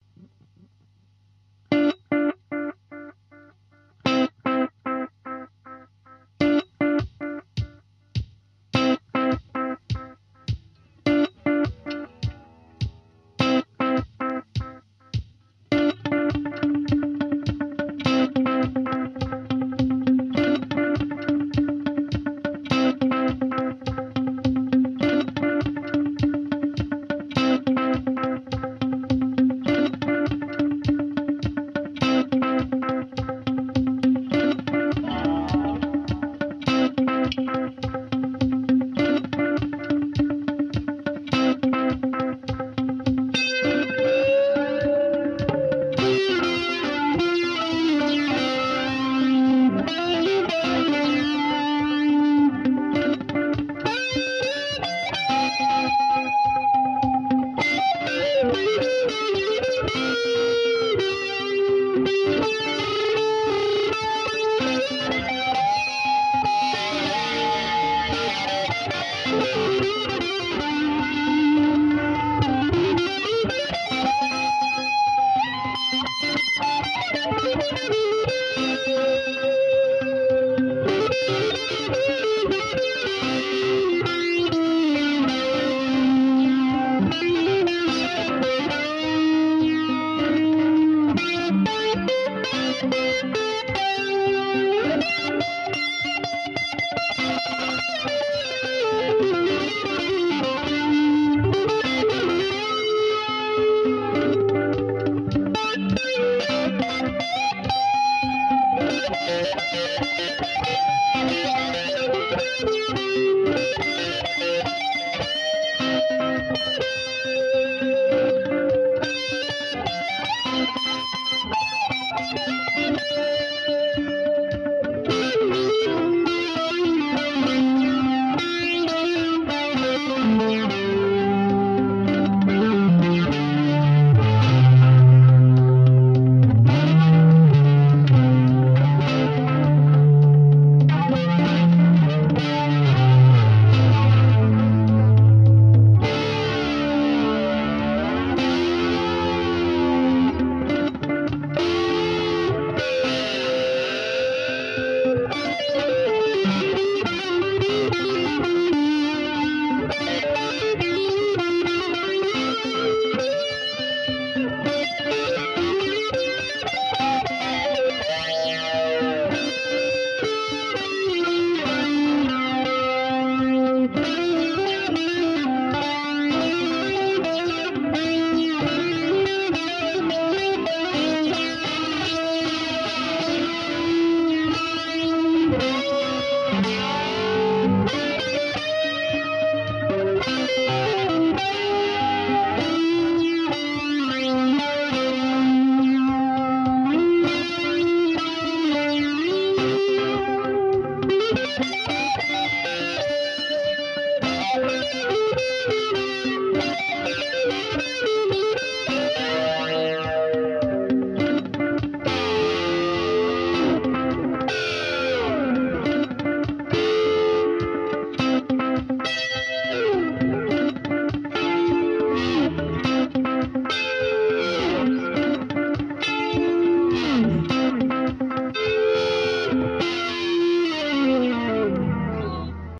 Experimental guitar Improvisation in loop machine (lo-fi)
This is instrumental Improvisation in Dm tonality which was record in real-time, when I plaed in electric guitar through ELECRTO-HARMOX DOUEBLE MUFF, BOSS BF-2, YOJO DIGITAL DELAY, BOSS FRV-1 and BOSS RC 20 loop-machine.
Some experiment sketch.
Dm-chord, Improvisation, Loop, chord, delay, distorted, distortion, echo, electric, electric-guitar, experiment, fuzz, guitar, instrumental, lo-fi, music, overdrive, power-chord, reverb, riff, rock, sketch, solo